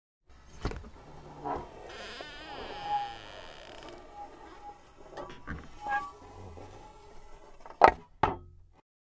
Wooden door 2
close, closing, door, open, opening, squeaky, wooden